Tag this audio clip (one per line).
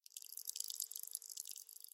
animal
ant
antennae
communicate
creature
insect
insects
nature